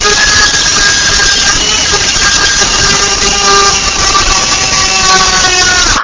A woman screaming.